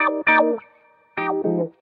GTCC WH 03
fm, wah, guitar, samples, bpm100